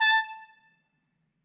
One-shot from Versilian Studios Chamber Orchestra 2: Community Edition sampling project.
Instrument family: Brass
Instrument: Trumpet
Articulation: staccato
Note: A5
Midi note: 81
Midi velocity (center): 42063
Room type: Large Auditorium
Microphone: 2x Rode NT1-A spaced pair, mixed close mics
Performer: Sam Hebert